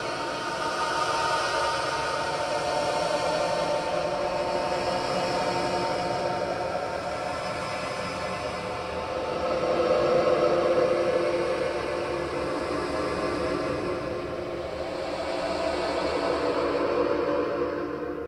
Made using Audacity & Recording metal to metal with dynamical microphone
1. Effect: Amplify 9.0dB
2. Effect: Change Speed -20% & Change Tempo +20%
3. Effect: Paulstretch. Stretch Factor: 8. Time: 0,3.
Typologie de Schaeffer: X Continu complexe
Morphologie
Masse: Son nodal
Timbre harmonique: Terne
Grain: Grain de frottements
Allure: Naturelle
Dynamique: Douce
Profil mélodique: Variations serpentines
Profil de masse:
Site: Son glissant et raisonnant